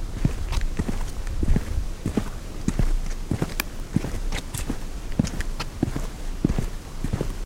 walking on tarmac
road, walking